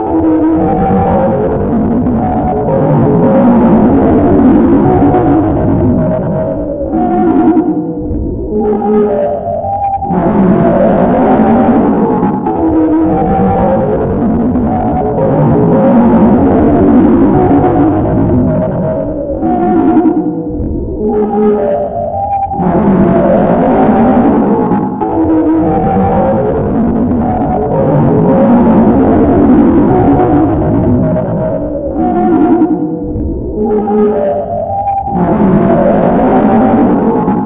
This is a weird noise I created for no logical reason. Made with Audacity sound editor by applying an unreasonable number of effects to the sound of me making noises (whistling, I think).